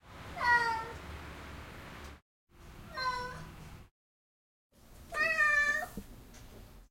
3 meows Loop
meow, cat, animals